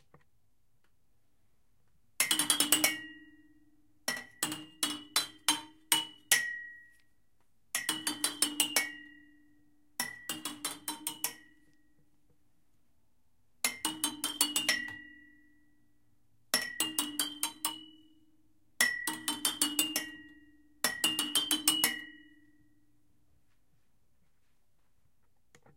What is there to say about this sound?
Brass Headboard
Striking a long plastic shoehorn along an old headboard made of wide brass pipes. Produces an ascending and descending sequence of notes.
brass-pipe, musical, pipes, strike, tones